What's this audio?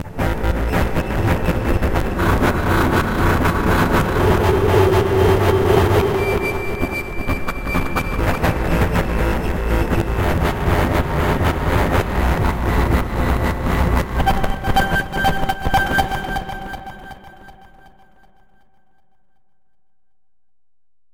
Violent, experimental and distorted bass.
Scale- D# Arabic
Bpm- 120